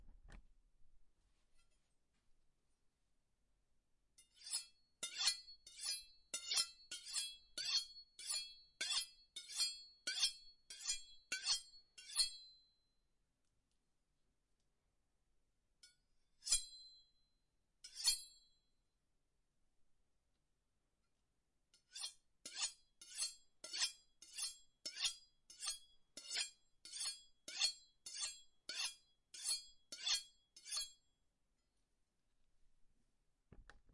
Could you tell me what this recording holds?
Sharpening a knife
Recorded with zoom H4N
sharpening, blade, kitchen